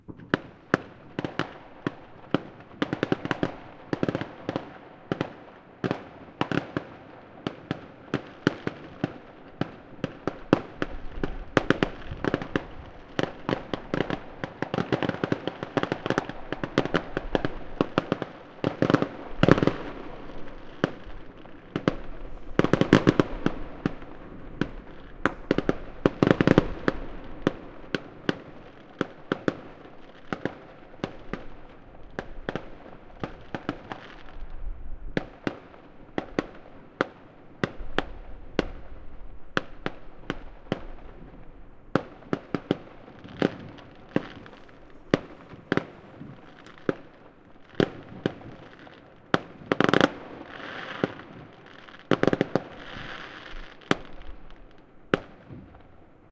New year fireworks
explosion, firework